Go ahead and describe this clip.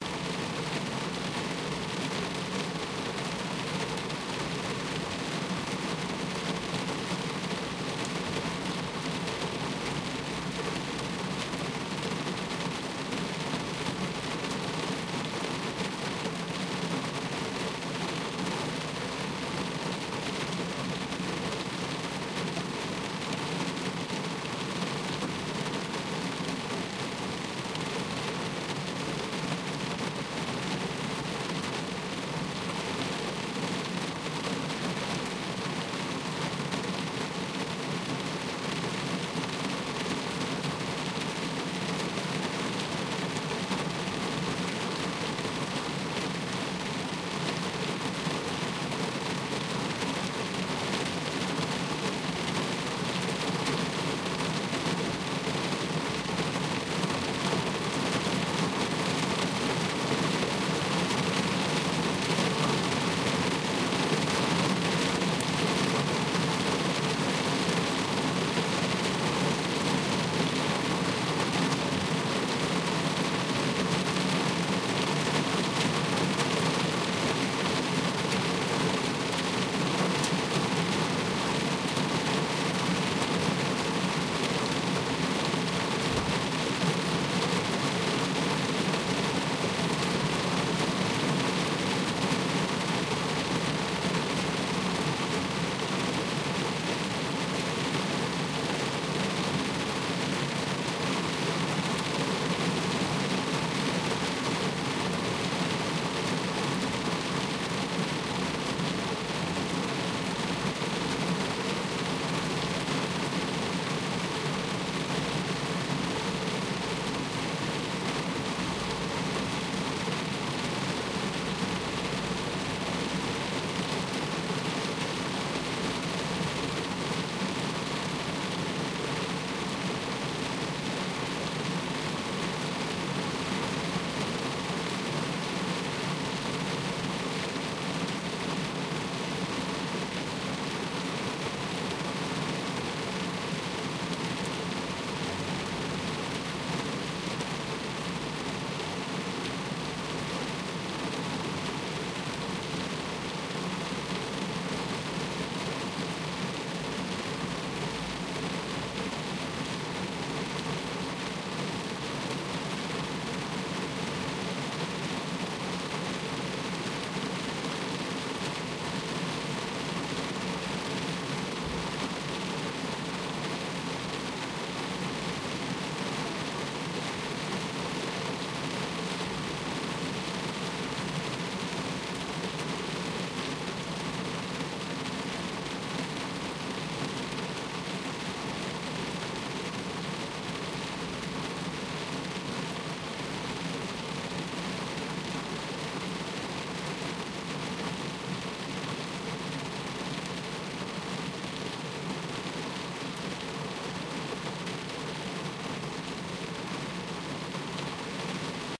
Heavy rain on windshield, recorded inside the car.
rain, heavy-rain